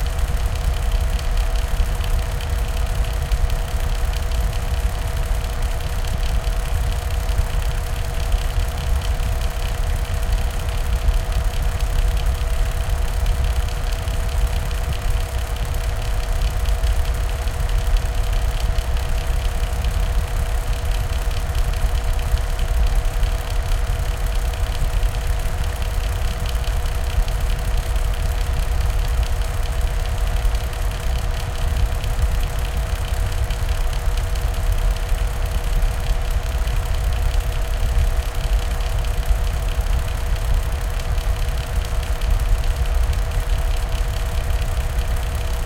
old ventilator at home.
device: zoom h2